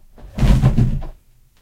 sliding shelf
desk, house, kitchen, office, shelf, slide, sliding